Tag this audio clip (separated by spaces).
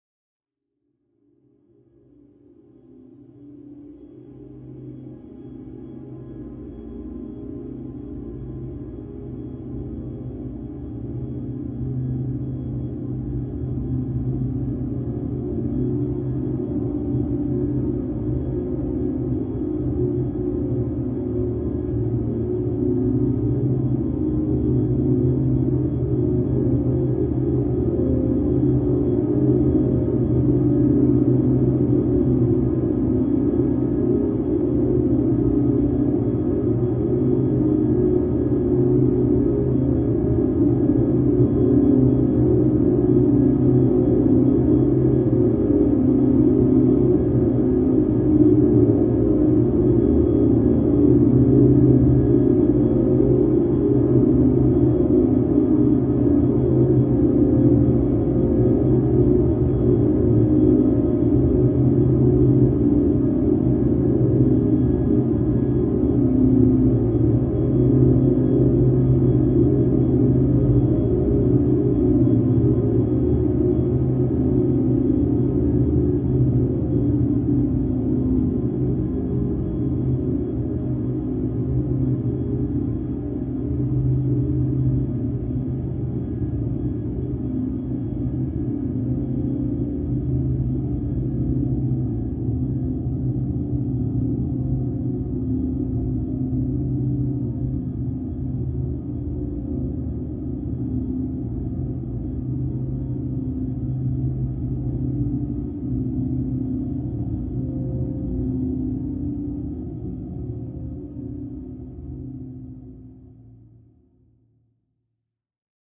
drone ambient multisample